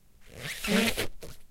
zoom H4.
pulling the yoga mat with my hand and letting it slip.
rubbing, mat, rubber, squeak, yoga